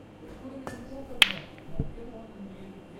Recorded with: Zoom H6 (XY Capsule)
Ball break on a pool table in a public bar, with chatter in the background.
Sound 2 of 2.
ball-break, club, pool-table, pub, public-bar